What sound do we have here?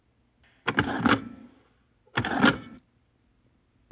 I think its a knife being sharpened